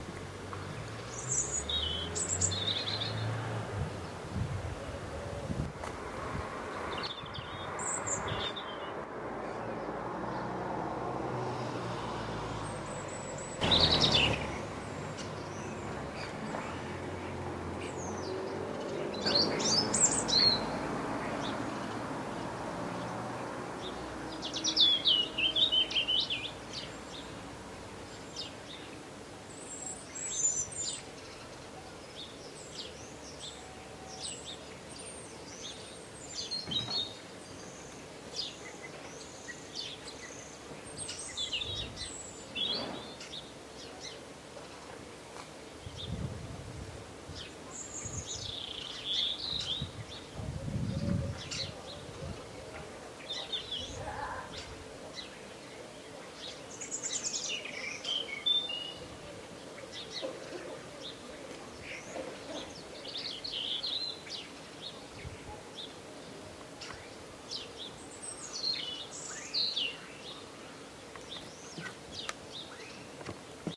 Blackbird possibly
I didn't see which bird this was as I was recording a video and kept the clip for this audio. I have cut the volume of a passing car. The bird singing maybe a blackbird, possibly blue tit, robin comes to mind or a starling which do imitate other birds.
off-video, March, morning, rural-garden, field-recording, South-Yorkshire